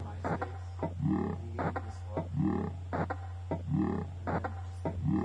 Off of my DD 20 I encountered this Random "Alieatron" effect it was kind of scary...